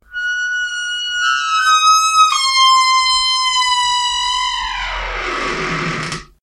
Squeaky Door03
My bathroom door is horribly squeaky
Door, Squeak